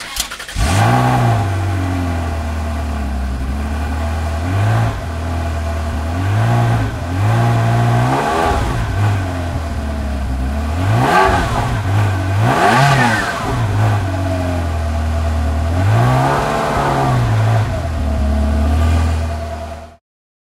Ferarri 360 Exhaust
car, sports, vehicle, engine